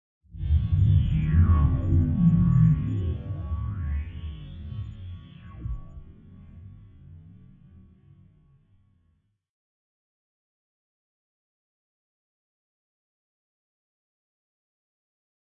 space sweeps 001
Sweep sound.
First from series of processed samples recorded in kitchen.
Recorded with Zoom h2n
Processed with Reaper
fx, noise, one, processed, sci-fi, shot, sound, sound-design, space, strange, sweep